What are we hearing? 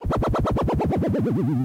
jO-Key non-reverse
non-reverse, wobbler